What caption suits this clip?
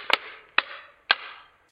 These sound like alien creatures or strange generator loops (imho)